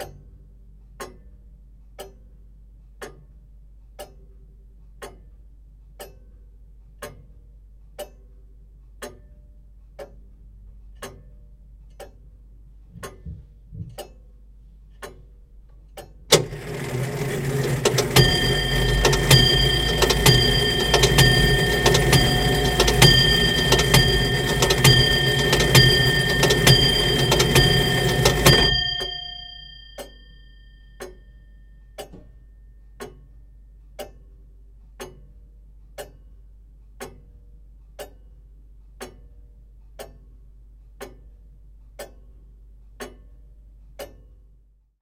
Grandfather clock ticking, followed by twelve fast chimes and then more ticking.
Recorded using a Rode NT1000, Tascam US122L and Logic Pro.